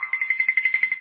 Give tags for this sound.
Cartoon Funny

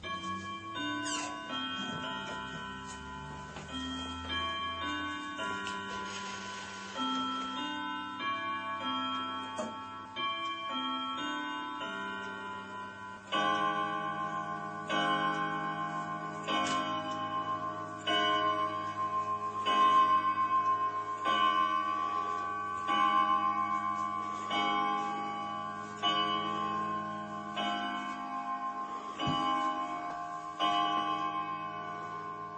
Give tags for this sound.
Clock-Chime Wall-Clock-Chime-12-Strikes Clock-Chime-Strike-12

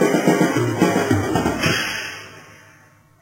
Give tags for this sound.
lofi beat percussion drum loop dirty roll

